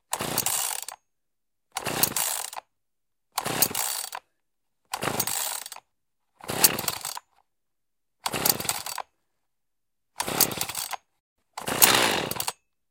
8 Chainsaw Pulls
Eight different attempts to pull start a chainsaw. The last has the engine firing, indicating that the chainsaw is ready to be started without the choke.
ignition, choke, chainsaw, machine, start, husqvarna, pull